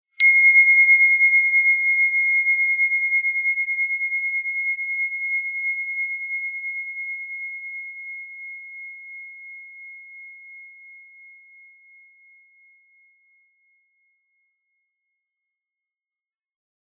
bell-meditation cleaned
bell, meditation, mono, natural, tibetan